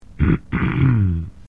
clear throat
Person clearing their throat. Recorded with stereo microphone, removed as much background noise that I could.